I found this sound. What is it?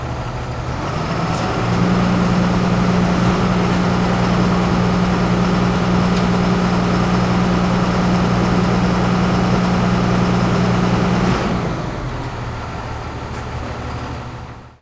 Garbage truck compacting garbage
Stop garbage truck and engine being used to compact the trash from the hopper. Recorded with Zoom H1.
Caminhão de lixo parado e motor sendo usado para compactar o lixo da caçamba. Gravado com Zoom H1.
container, engine, garbage, rubbish, trash, truck